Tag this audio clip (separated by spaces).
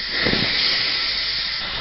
heater sissling cracking water Sauna